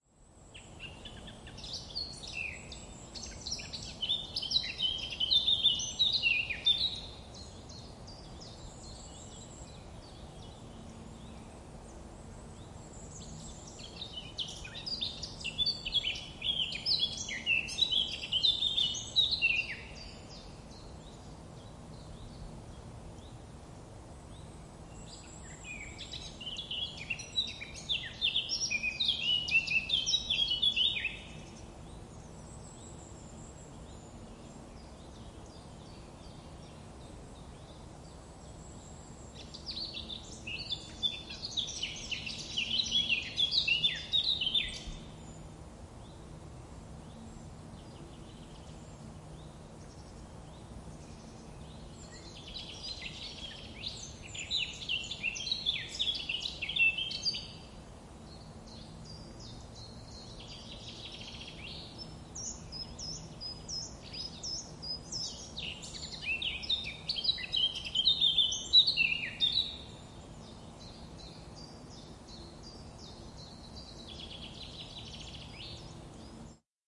bos maartensdijk 2 juli 2014 0900 ochtend vogels birds light distant traffic
A Dutch forest I recorded when biking through it. We use it a lot on television.